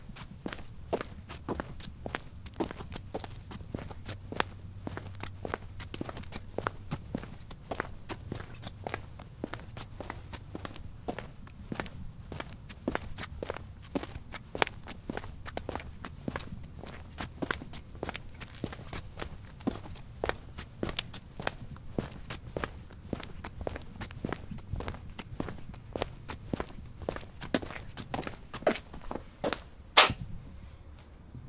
Footsteps - concrete - OD - B
Walking on concrete sidewalk - microphone just ahead of the feet - SonyMD (MZ-N707)
foley, footsteps, human, walking